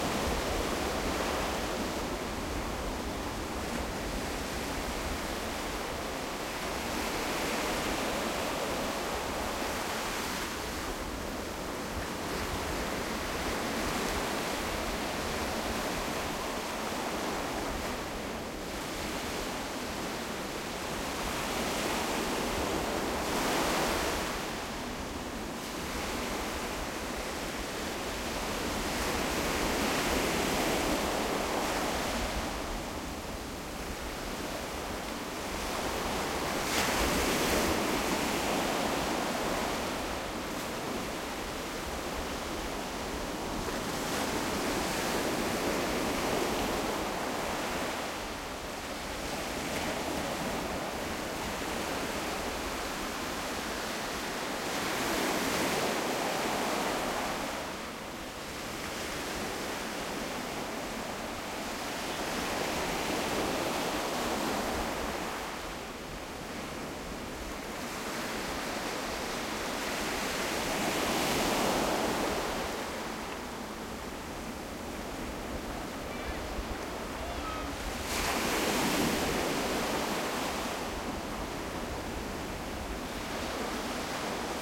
waves beach medium close